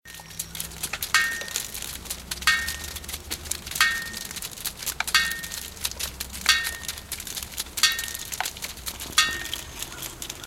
br Raindrops2
rain, raindrops